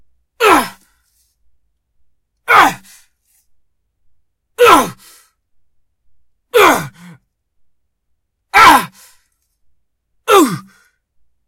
Male Hurt
Me sounding like i'm getting hurt. Great for videogames and various projects.